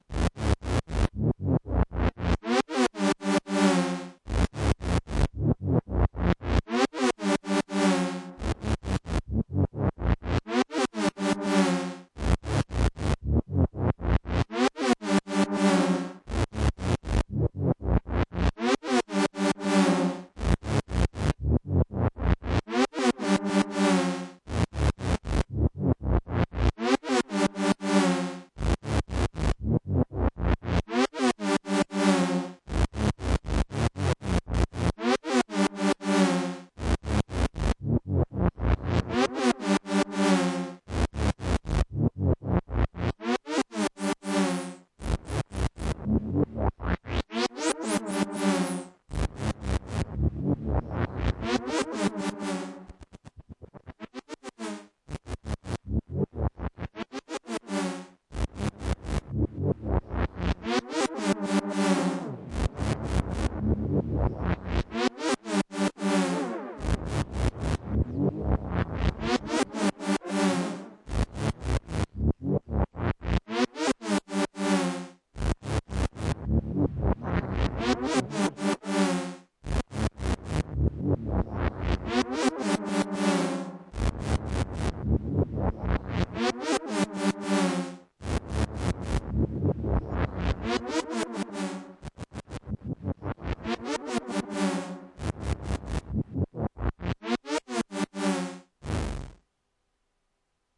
soundscapes for the end of the world